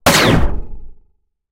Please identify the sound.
Recreated Star Wars Blaster
videgame, Shoot, Rikochet, Gunshot, Fire, SciFi, Pulse, Light, Rifle, Laser, Bang, Loud, Machine, Pew, Wars, Blaster, Shot, Heavy, Gun, Star